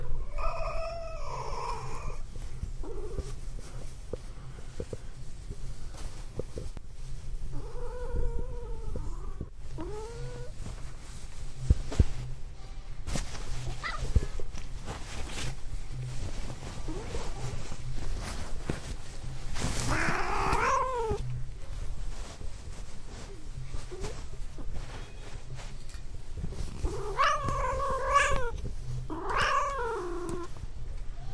Puk isn't too happy about being removed from the bed - part 2.
cat, zoom-h2